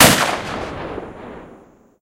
Shotgun Shot 03
Shotgun shot!
If you enjoyed the sound, please STAR, COMMENT, SPREAD THE WORD!🗣 It really helps!
no strings attached, credit is NOT necessary 💙
Gun, Weapon, Trenches, Fire, Shoot, Cinematic, Film, Hunt, Target, Warfare, Attack, Firing, Game, Shot, Shotgun